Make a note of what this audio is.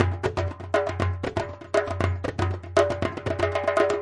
Doumbek Loop Stereo6
Recording of my personal Doumbek 12”x20” goblet hand drum, manufactured by Mid-East Percussion, it has an aluminum shell, and I installed a goat-skin head. Recording captured by X/Y orientation stereo overhead PZM microphones. I have captured individual articulations including: doum (center resonant hit), tek (rim with non-dominant hand), ka (rim with dominant hand), mute (center stopped with cupped hand), slap (flat of hand), etcetera. In addition I have included some basic rhythm loops which can be mixed and matched to create a simple percussion backing part. Feedback on the samples is welcome; use and enjoy!
Egyptian, Djembe, stereo, hand, Darabuka, Silk-Road, Middle-East, Tombek